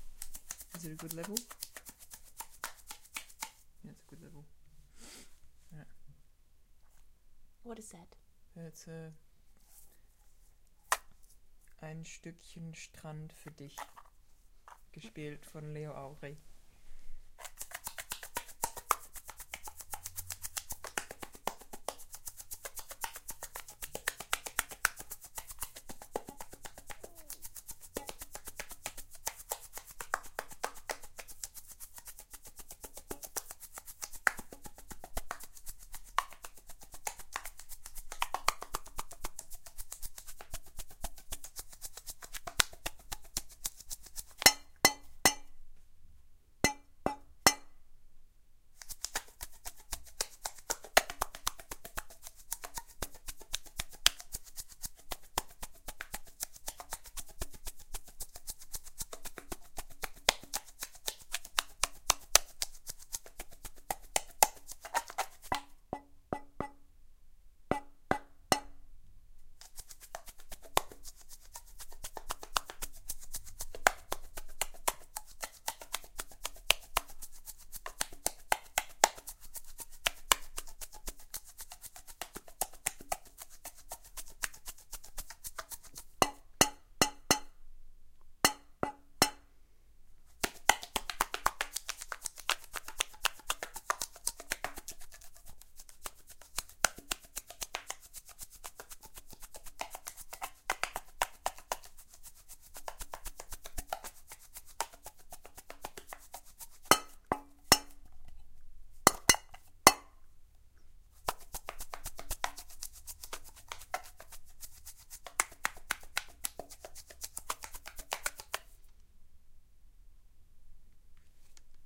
sand in a jar for you
Shaker sixteenths rhythm, sand in a jar.
Recorded with m-audio Microtrack II / T-mic
stereo-field, clang, sixteenths, shaker, repetitive, clack, metal